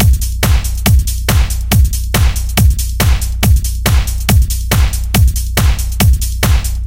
Hard EDM Drum Loop 140 BPM
A drum loop for electronic dance music. The kick and snare are the loudest parts of the loop so this one is very hard-hitting and impactful.
drum-loop, loop, techno, edm, groovy, drum, drums, dance, hard, beat, 140-bpm, snare, kick, percussion